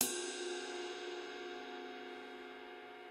05 Ride Long Cymbals & Snares
Bosphorus, bubinga, Cooper, cymbal, drumset, hit, Istambul, turks